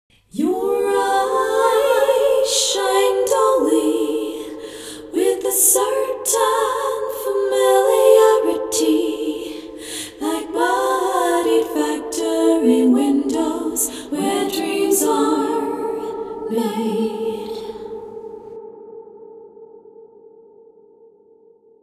"your eyes shine dully..." sung
Me singing in harmonized tracks "Your eyes shine dully, with a certain familiarity, like muddied factory windows where dreams are made". The clip preview might have squeaks, but the download is high quality and squeak free.
Recorded using Ardour with the UA4FX interface and the the t.bone sct 2000 mic.
You are welcome to use them in any project (music, video, art, interpretive dance, etc.). If you would like me to hear it as well, send me a link in a PM.
Original BPM is 100
a-cappella, female-vocal, katarina-rose, life-drags-by, vocal